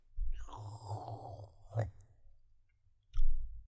sucking spaghetti or something from tube
absorb, noodles, spaghetti, sucking, tube, tubule